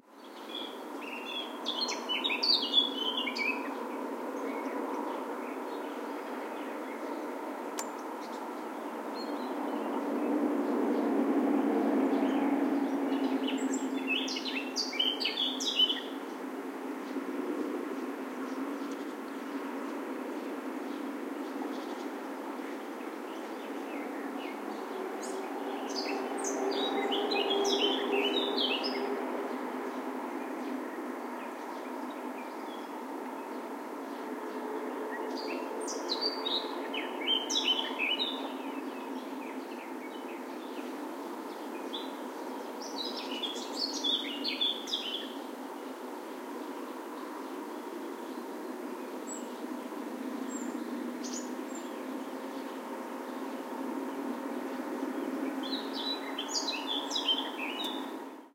Close-up song of a Garden Warbler. Some other birds in the background. Recorded with a Zoom H2.